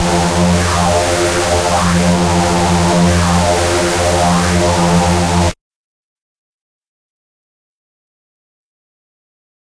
multisampled Reese made with Massive+Cyanphase Vdist+various other stuff